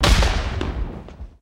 A large explosion with some echo and reverb. Made from an alienbomb sound pack.